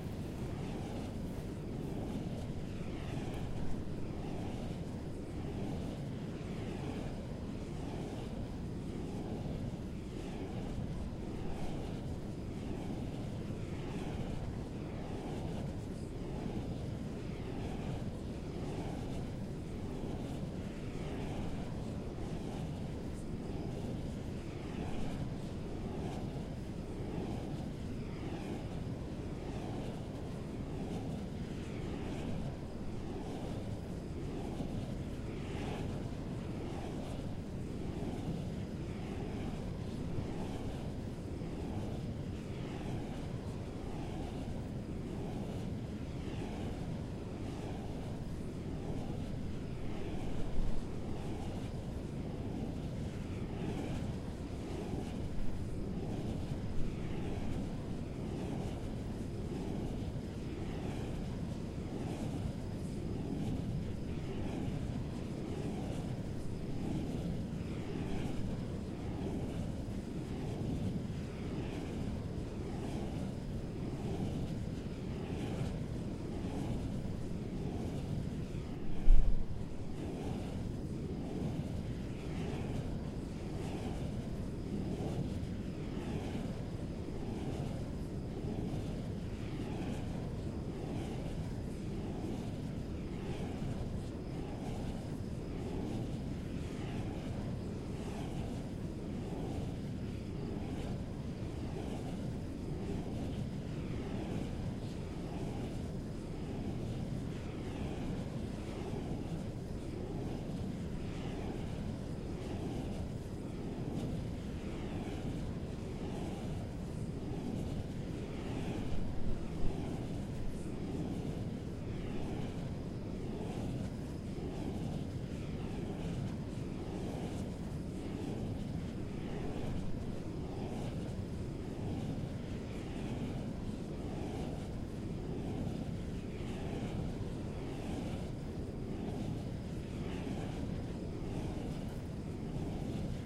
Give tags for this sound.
crickets; field-recordings; wind-plant; soundscape; nature; countryside

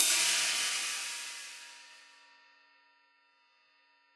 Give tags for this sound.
1-shot; cymbal; hi-hat; velocity

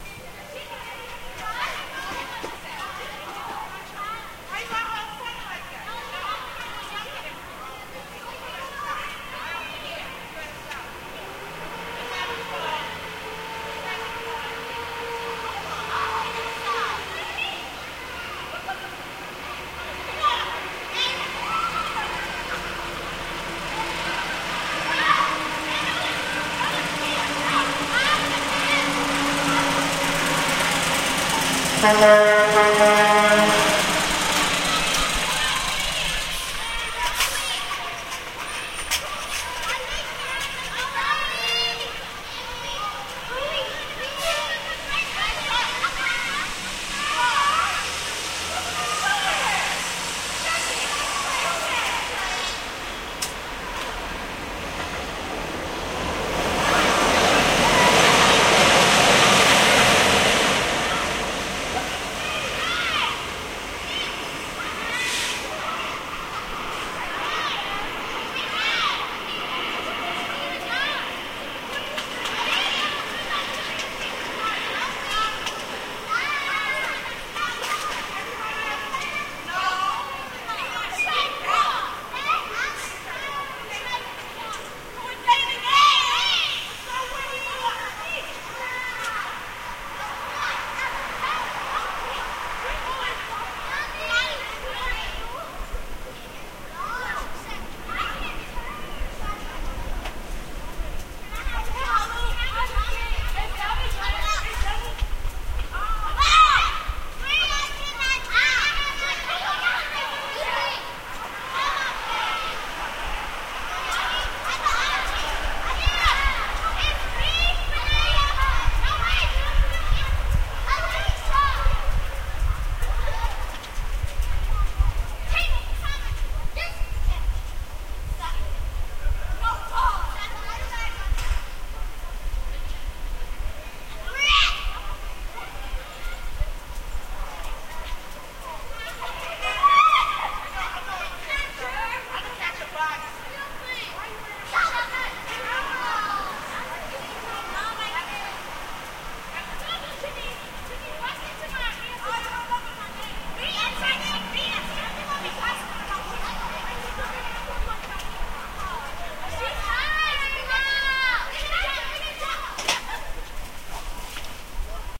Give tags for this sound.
brooklyn; car; horn; kids; shuttle; street; subway; truck

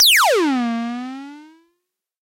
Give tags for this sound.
crash; electro; harmonix; drum

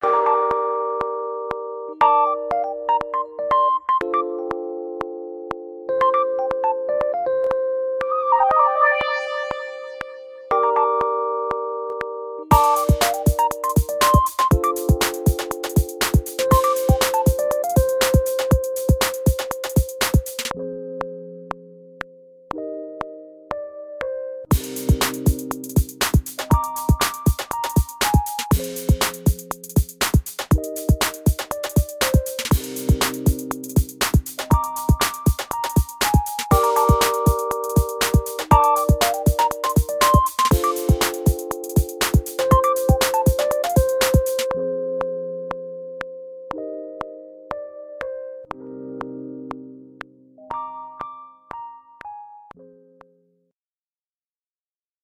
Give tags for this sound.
electric; Ambiance; Synthetic; relaxing

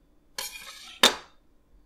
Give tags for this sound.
blade; knife; slide

